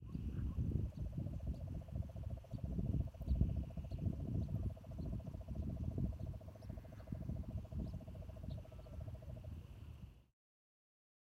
Frog croaking in the beach of Jericoacoara, Ceará, Brazil.
Beach, Brazil, Croaking, Frog